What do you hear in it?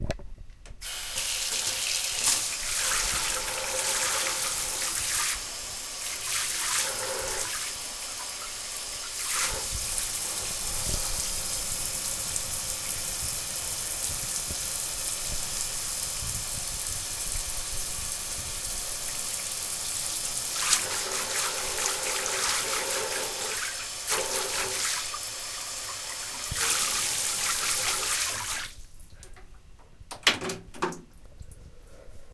This is part of a series of workshops done in collaboration with Casa Asia, that attempt to explore how immigrant communities in Barcelona would represent themselves through sound. Participants are provided with recorders that they can take with themselves and use daily, during a period of time.
In the workshop we reflect collectively on the relation between the recorded sounds, and their cultural significance for the participants. Attempting to not depart from any preconceived idea of the participant's cultural identity.
Sound recorded by Mary Esther Cordero.
"Es el sonido de la ducha en mi casa, mientras me lavo. Obviamente, la grabación se hizo en el baño de mi casa. Es uno de los sonidos que se suele escuchar cada día en casa, puesto que forma parte de nuestras vidas rutinarias, aunque cada uno se duche a una hora distinta en casa."
elsodelescultures, shower, filipino-community, casa, agua, ba, Barcelona, city, intercultural, o, ducha, water, routine, casa-asia, home